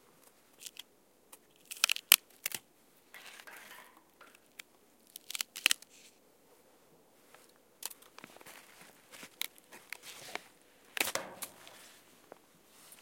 I recorded sound of a bark tree.
forest, tree, bark